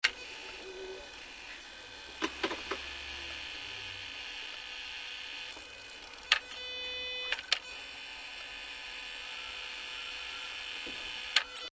Aston noises
automobile, car, engine, ignition, sports, vehicle